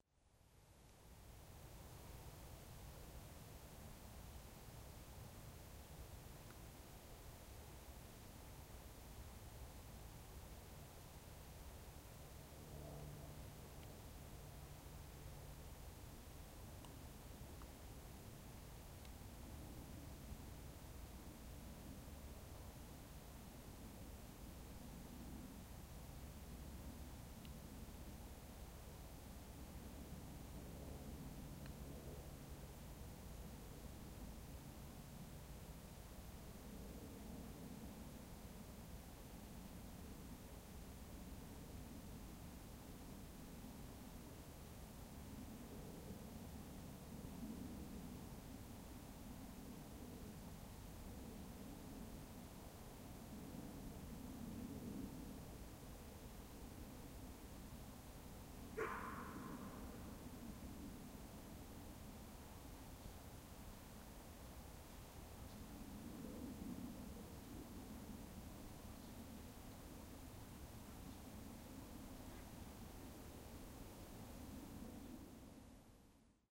silent forest
A recording in a forest in the Netherlands, this is a sample of "the
silentness in the forest in the year 2006". You can hear some
background noise like a highway,a plane flying by and a dog barking.